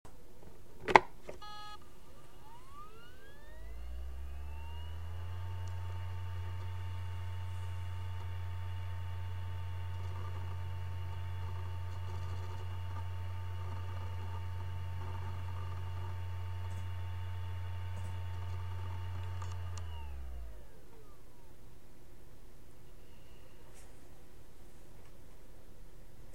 This is a Iomega eGo portable 1000GB(1TB) HHD.
Recorded with a Logitech HD 720 P Webcam.